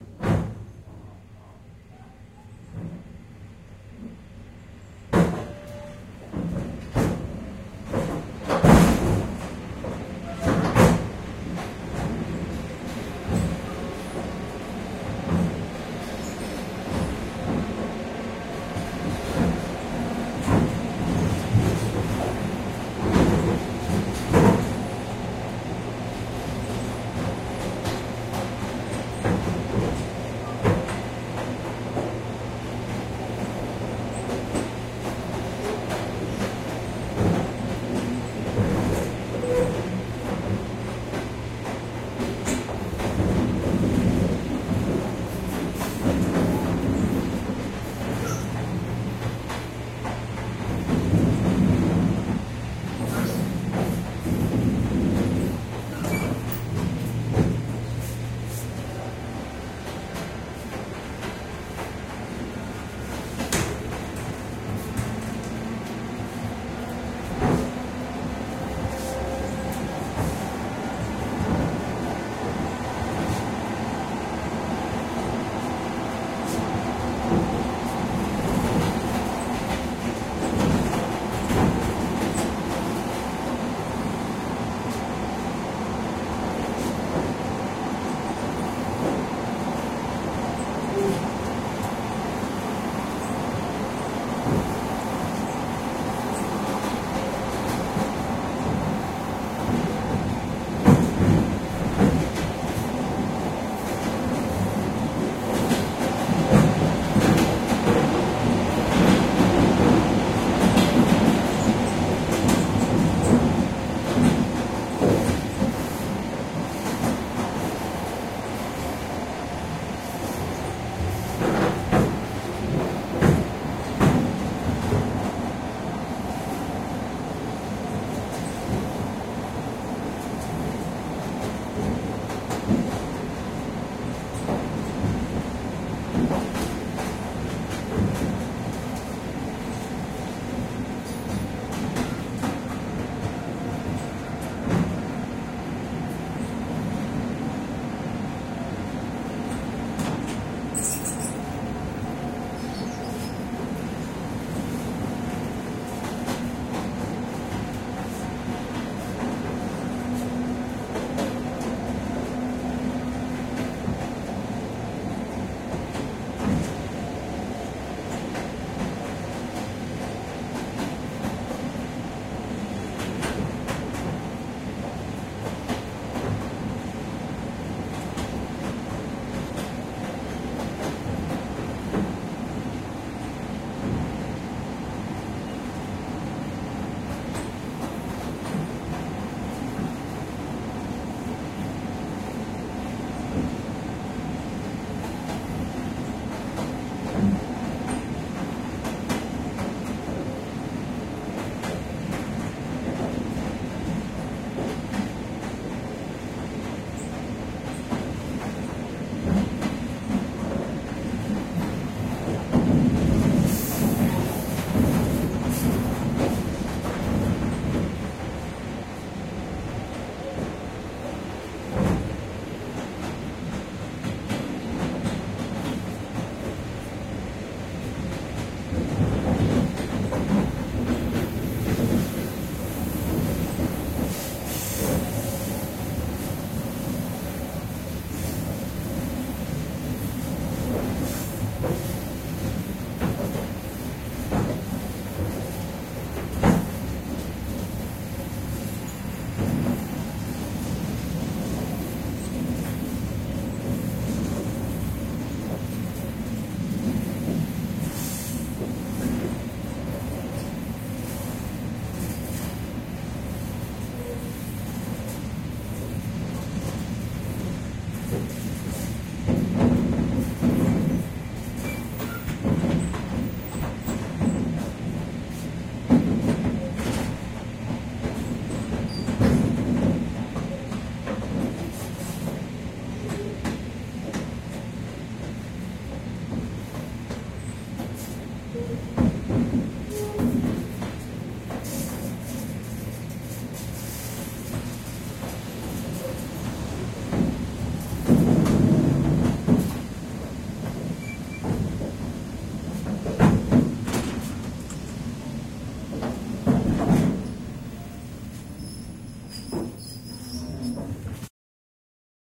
5 minutes of noises recorded late in the evening in a car vestibule of a suburban train near Moscow, Russia. Tascam DR-05 has been used for recording. Killed frequencies above 19kHz (DR-05 appears to have a nasty noisy peak somewhere above it), and boosted side channel a bit. Enjoy, and don't forget to comment.
field-recording, late-night, inside-train, car-vestibule, suburbs, suburban-train, train